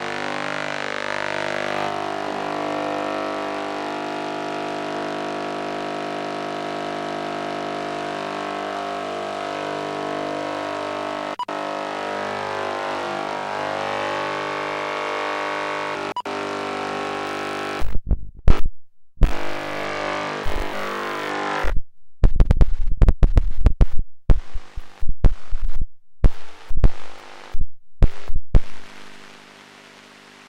noise radio, recorded from a radio scanner Icom, in Moscow.
radio, tuning, noise